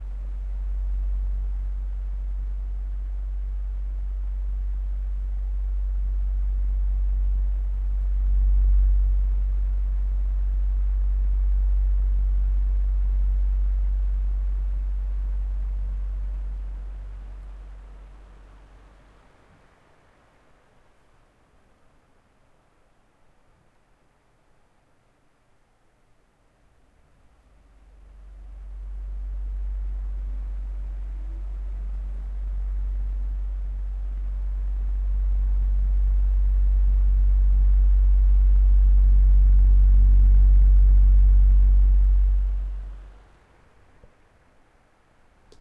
Fan Buzz Very Close 1
edited, foley, free, frequency, h5, high, high-quality, Oscillation, pattern, Pulsating, Repeating, sample, sound, stereo, zoom